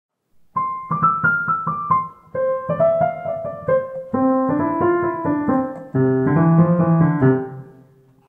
Sample song 3
A school project piano sample!
sample, school, music, song, piano, project